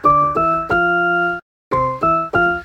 made with my piano